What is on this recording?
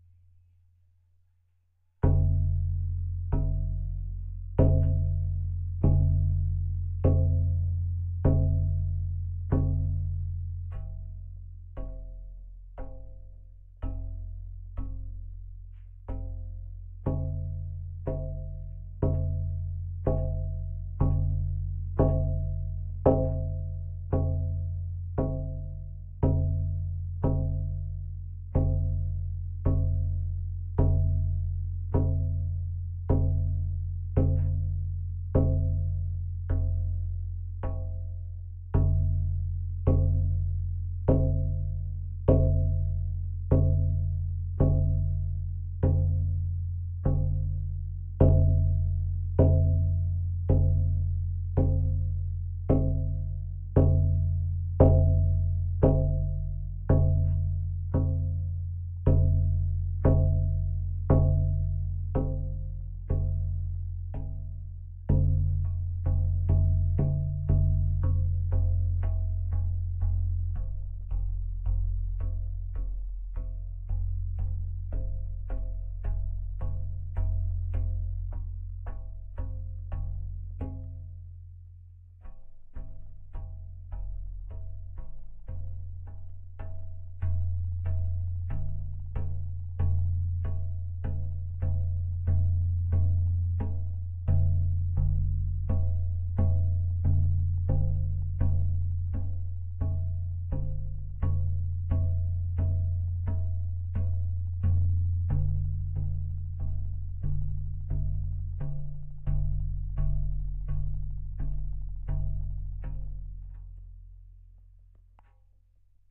Galvansied gong
Slowly tapping at a piece of sheet metal held in a clamp, using a make shift padded drum stick.